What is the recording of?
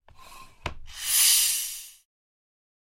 Bicycle Pump - Plastic - Medium Release 07
A bicycle pump recorded with a Zoom H6 and a Beyerdynamic MC740.
Gas, Pressure, Pump, Valve